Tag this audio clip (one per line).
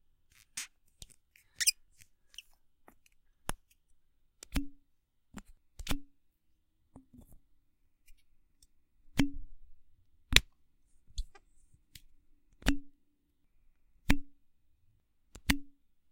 cork
twist
wine
open
pop
squeak
drink
bottle